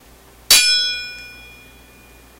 My Sword Hit 1
Sword striking another sword.